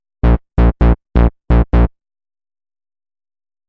Techno Basslines 006
Made using audacity and Fl Studio 11 / Bassline 130BPM
bass, Basslines, 130BPM, sample, Techno